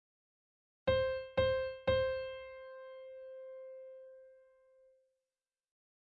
Do C Piano Sample